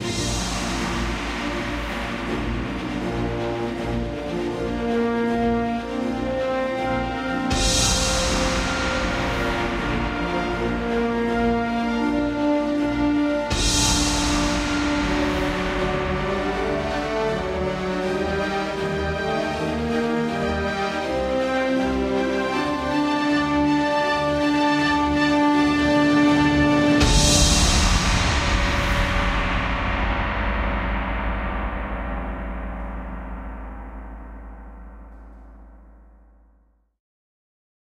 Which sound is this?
This is a 30 seconds track inspired by the Marvel Fanfares intros in the MCU movies. It can be used as a soundtrack for an intro, trailer or video. I made it using The BBC Symphony Orchestra Discover by Spitfire.